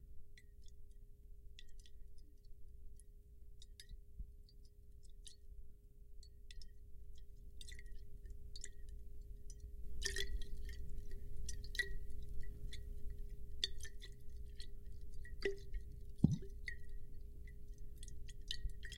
Liquid in Bottle
A 12 oz bottle half filled with water being shaken at different intensities.
beer, moving, shaking